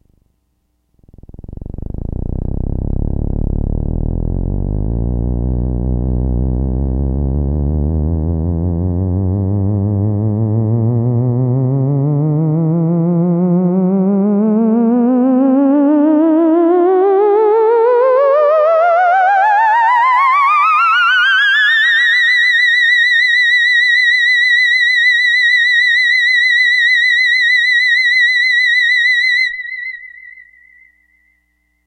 scifi sweep b
Mono. Wet. Same classic sound as sweep a but recorded wet with slight delay and reverb.